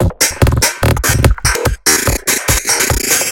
These are heavily processed beats inspired by a thread on the isratrance forum.
psytrance, processed, beats